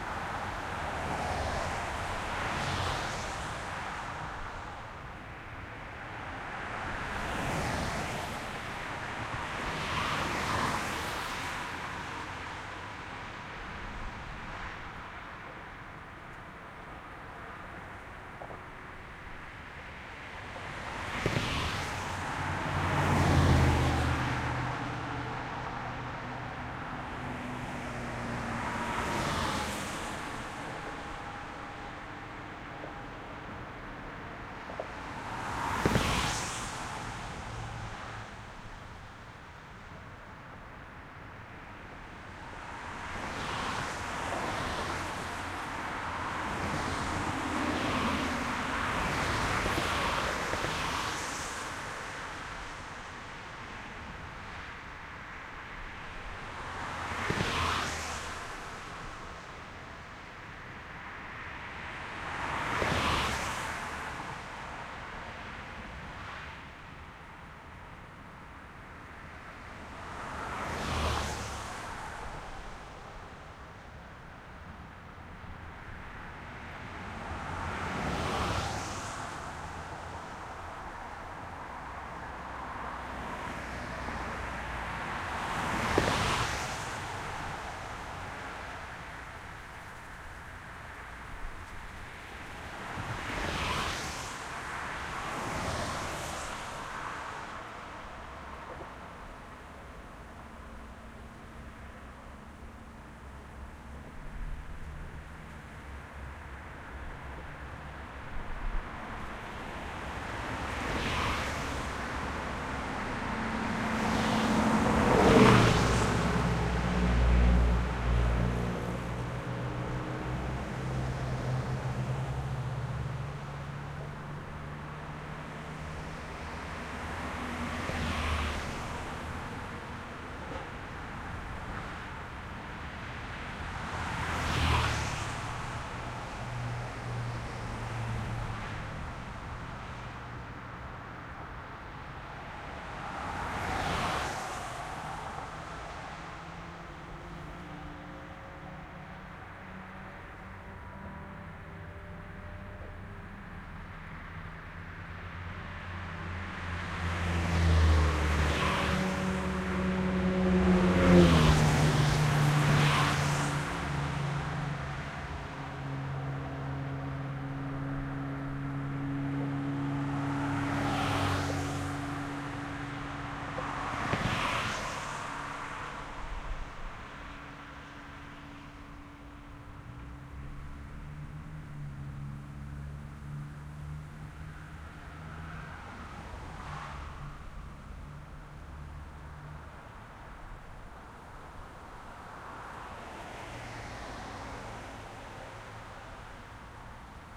traffic highway light soft car pass bys over dividers nearby kinda ghostly Mercier Bridge3
dividers, Bridge, highway, pass, traffic, Mercier, car, soft, ghostly, bys, light